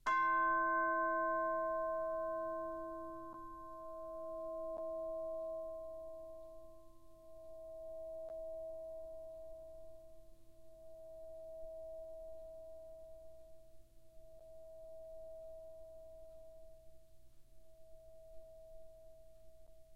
Instrument: Orchestral Chimes/Tubular Bells, Chromatic- C3-F4
Note: C, Octave 2
Volume: Piano (p)
RR Var: 1
Mic Setup: 6 SM-57's: 4 in Decca Tree (side-stereo pair-side), 2 close
bells, chimes, decca-tree, music, orchestra, sample